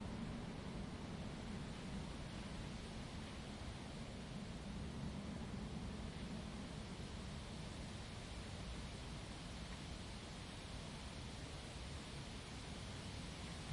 on the hill over the city
On top of the hill in the center of the city.